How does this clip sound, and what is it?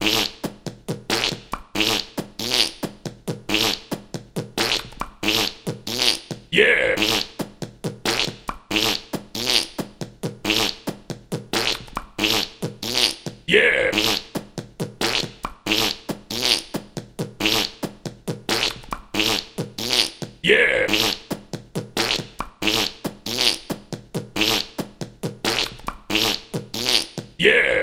138-fart fart yeah
A fart + some farts loop 138 bpm..
farts, funny